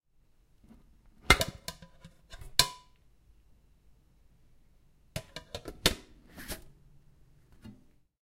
Opening & closing cookie jar (metal)
A cookie jar being opened and closed. Sadly, it was empty.
jar, Cookiejar, foley, close, open, cookie, opening, closing, metal, box